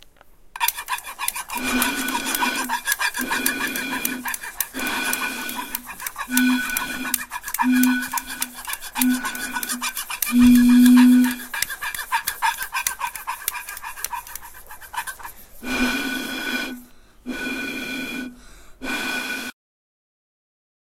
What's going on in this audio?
Soundscape GWAEtoy randombyBjorn
First soundscape crafted and edited mixing sounds from Switzerland, Athens and Gent